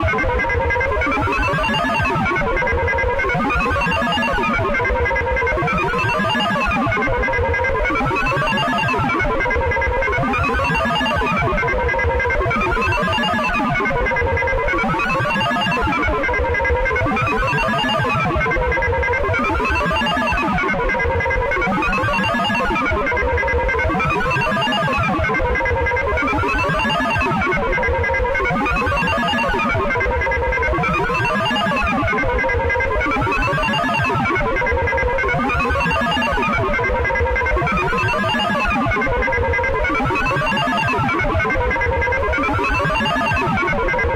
Repetitive echoey drone or loop of a triangle oscillator as a modulation source and a sine wave signal as the carrier source. The sources are being controlled by low frequency oscillators.